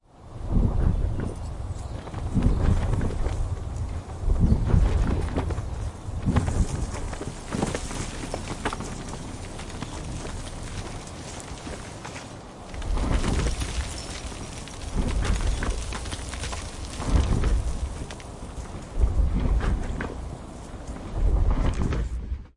SlowFX Horse Gallop
Slow fx horse gallop
slow, fx, horse, gallop